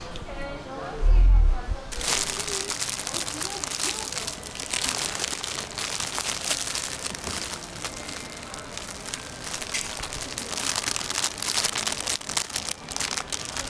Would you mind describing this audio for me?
Some rustling of plastic packing in a shop.